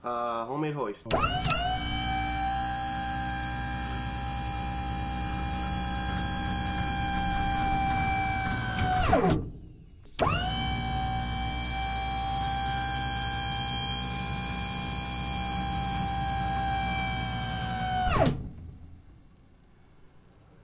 Homemade hydraulic hoist
Hydraulic lever that controls a hoist that would lift an ATV